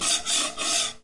messer - holz - 08
Samples of tools used in the kitchen, recorded in the kitchen with an SM57 into an EMI 62m (Edirol).